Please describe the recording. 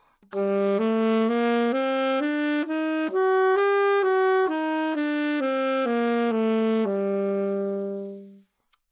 Sax Alto - G minor
Part of the Good-sounds dataset of monophonic instrumental sounds.
instrument::sax_alto
note::G
good-sounds-id::6835
mode::natural minor
sax, scale, neumann-U87, good-sounds, alto, Gminor